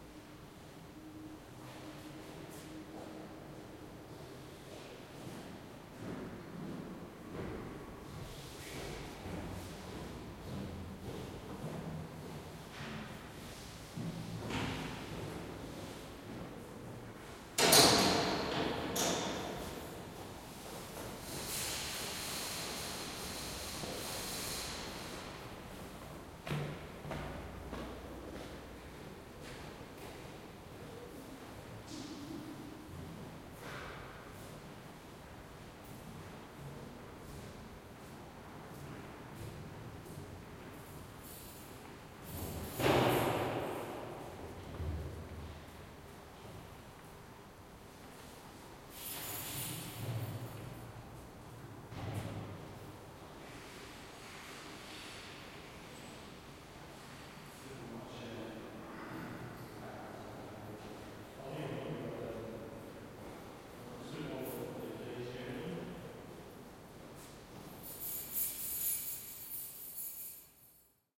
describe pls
Recorded with Zoom H2N in 4CH Surround
Recorded INT Geelong Jail
Victoria, Australia
Doors, Surround, ATMOS, Jail